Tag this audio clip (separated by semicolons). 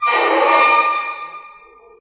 squeak; hospital; friction; metal; percussion; stool